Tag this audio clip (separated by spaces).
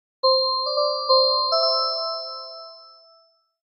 Video-Game Game Ghost Jingle Creepy